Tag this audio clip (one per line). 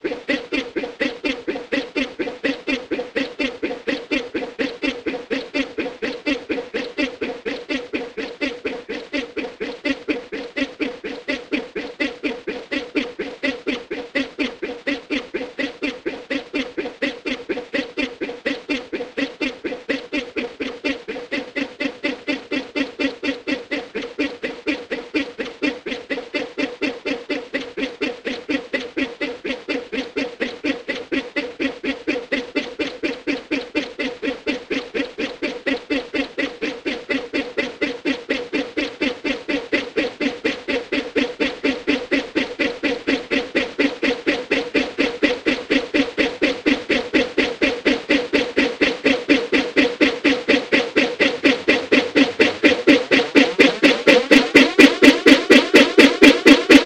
cinematic
evil
foley
granular
space
synthesis
synthetic